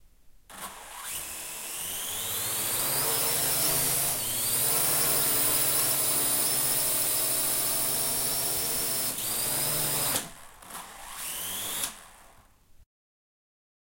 Quadrocopter recorded in a TV studio. Zoom H6 XY mics.